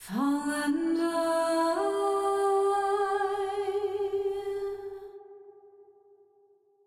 Me singing "fall and die". The clip preview might have squeaks and sound crappy, but the download is high quality and squeak free.
Recorded using Ardour with the UA4FX interface and the the t.bone sct 2000 mic.
You are welcome to use them in any project (music, video, art, interpretive dance, etc.).
The original song was made using 4/4 time at 125BPM